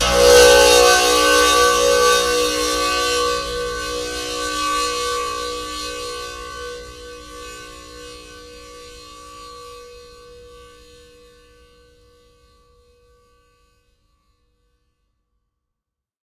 Recording of a single plucked string of the tamboura (indian instrument)
plucked, string, long, indian, tamboura, high-quality